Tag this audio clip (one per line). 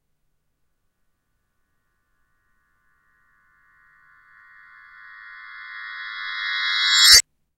instrument
bells
bell
horror
cluster
hand
clustered
percussion
reversed
reverse